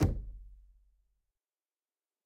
Knocking, tapping, and hitting closed wooden door. Recorded on Zoom ZH1, denoised with iZotope RX.